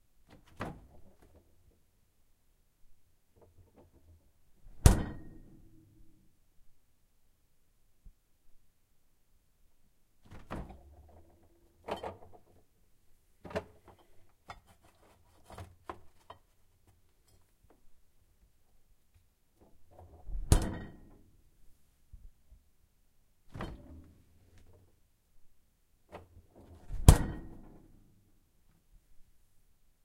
opening closing fridge door